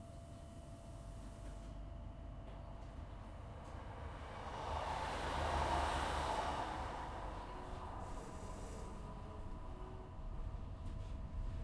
interior train other train passes 1
field-recording, travel, light-rail, above-ground, overground, other-train-passes, underground, train-passing, tube, subway, travelling, other-train-passing, moving, train, metro, interior